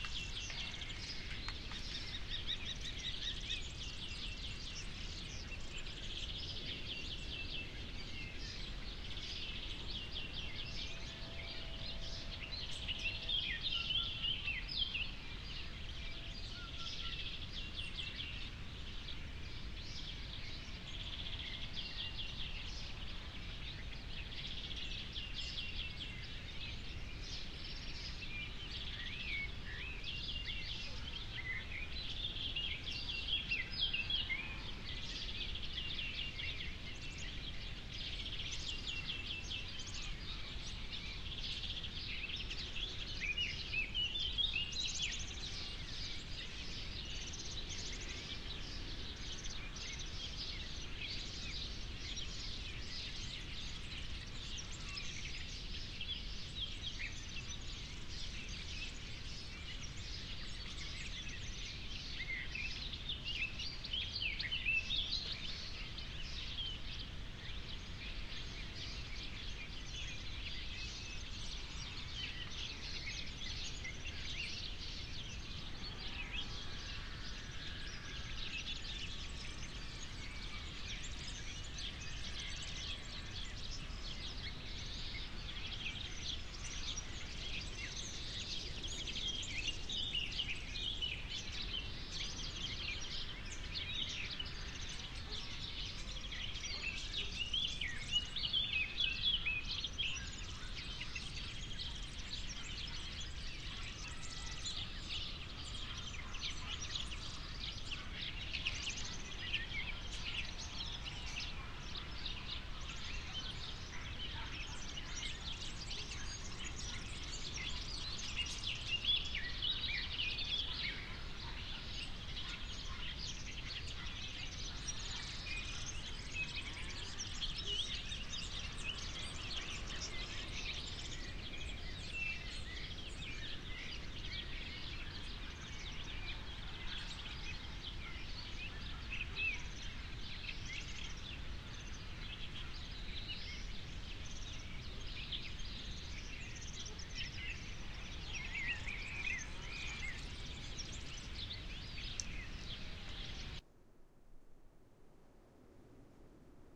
This is the same stereo recording of birds in an olive grove with some LF wind to cover the vacancy left after some traffic removal and a bit of work done to tame a particular loud bird.
Recorded with a pair of SM81s with an SD702